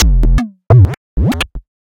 JCHC Loop 013
Here are some highlights from a collection of loops which I made using a home-built Reaktor ensemble. They're all based on a little FM synth instrument, whose parameters are modulated by a bank of 16 sequencers. They sound like John Chowning humping a cheap calculator. Hope you like them.
fm, glitch, loop, minimal, techno